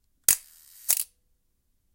The sound of the Focal TLR 35mm camera with a shutter speed of 1/2 second
shutter, camera, pro-tools, 35mm, focal